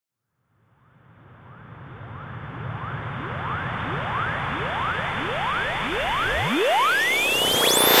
i designed these in renoise stacking various of my samples and synths presets, then bouncing processing until it sound right for my use